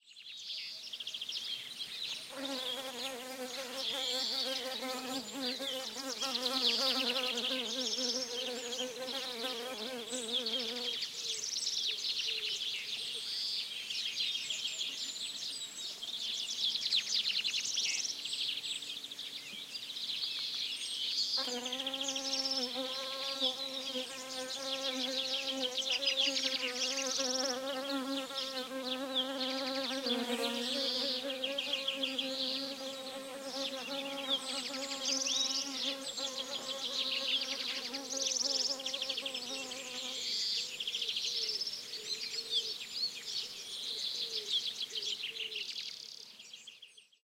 20160325 03.bees.PRIMO
Close up of bees buzzing (one honeybee and one anthophorid bee), birds singing in background. Recorded near Bernabe country house (Cordoba, S Spain) using Primo EM172 capsules into FEL Microphone Amplifier BMA2, PCM-M10 recorder
bees, buzz, field-recording, honeybees, insects, south-Spain, spring